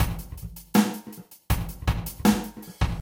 Simple 80bpm drum loop with room reverb.
beat, drum, drums, loop, room